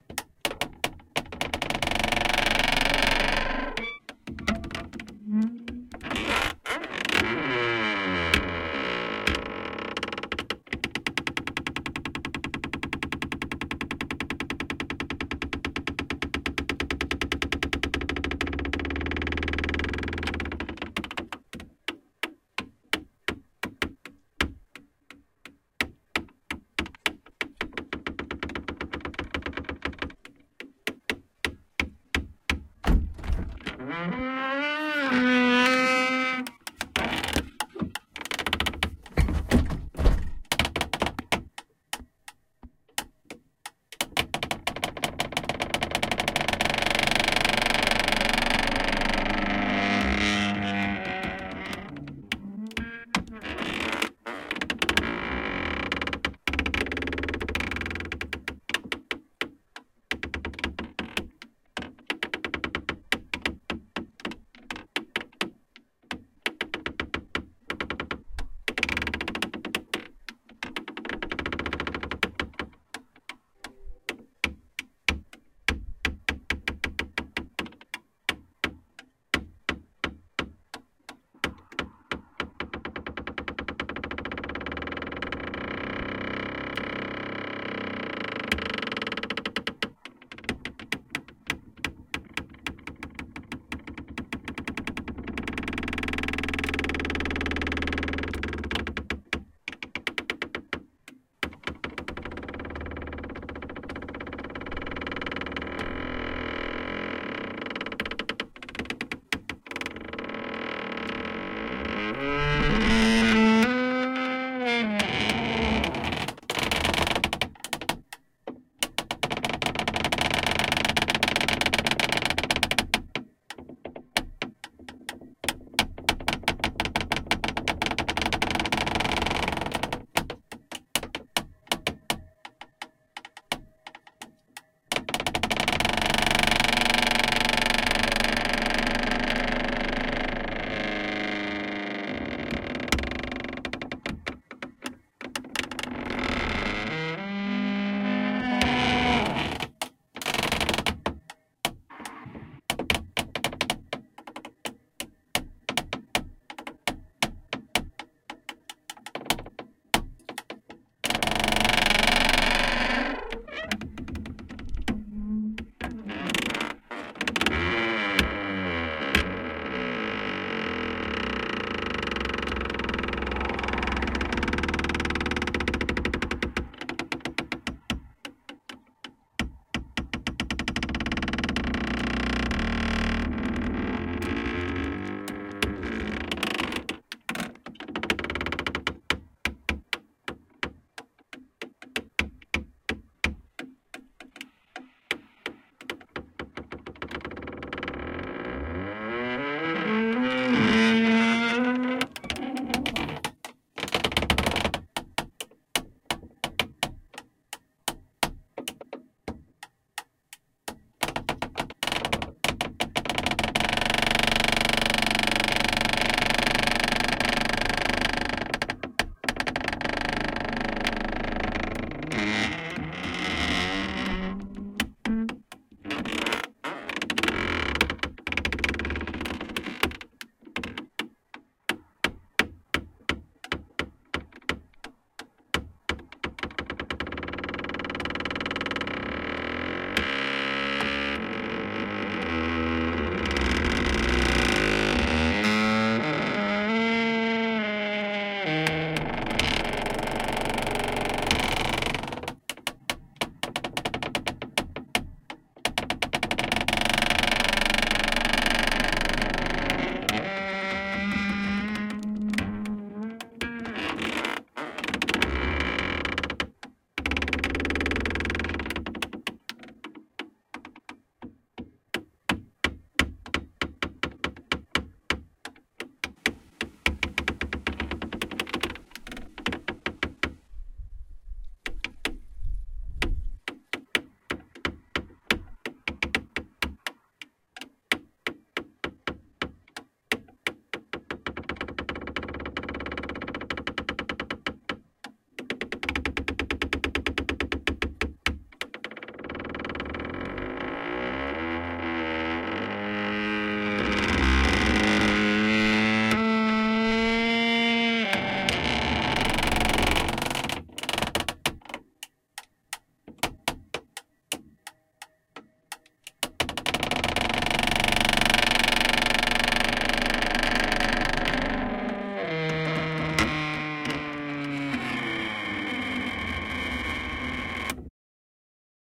Creaky door
I noticed one night my broken metallic glass screen door was making quite a ruckus in the wind. I grabbed my Zoom H1 and a roll of duct tape and taped it to the interior portion of the door. Plenty of good stuff to pull from here, IMO. Hope you can get some use out of this one. Enjoy.
creaky, creepy, door